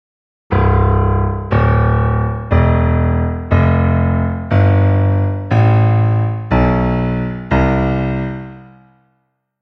C1 Major Scale Piano
C 1 Major Scale on Piano @ 60 BPM
major, piano, 60-bpm, scale, c